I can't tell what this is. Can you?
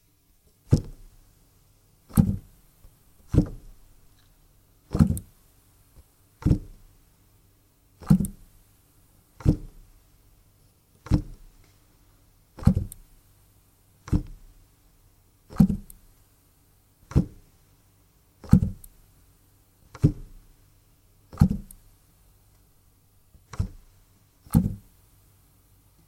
Piano - Dead Key - Double Long
Playing two dead keys on piano simultaneously.
keyboard, piano, dead